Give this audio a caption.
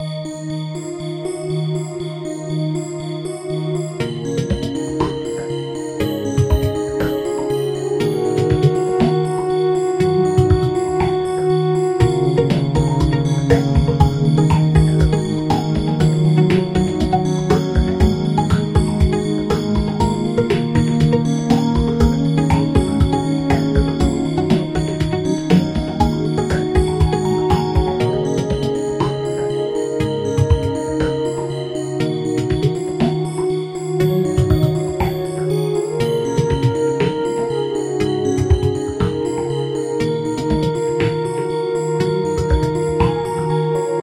Candyworld Background Music
Background music I made for a game a few years ago, sadly it never got released so I decided to share it with everyone here!
This one was supposed to be used for a candy world level.
Please show my name in the credits if you use my music.
And send me a message and link I'm excited to see what you used it for!
funky, rhythm, beat, rhythmic, fantasy, game, groove